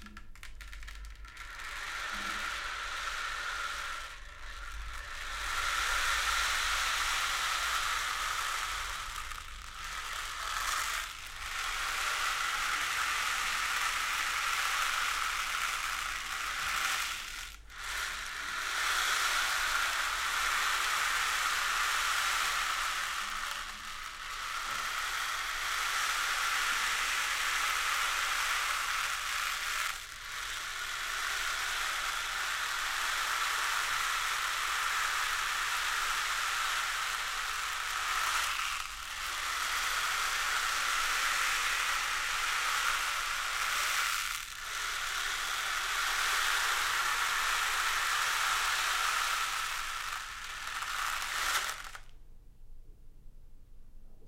Pau De Chuva 03
A traditional instrument/effect made by different cultures native to Brazil.
Piece of tree branch measuring one meter and approximately 8 centimeters in diameter, carved by termites.
They create small tunnels that are filled with gravel, grain or seeds.
Effect, pau-de-chuva, percussion, rain, rain-stick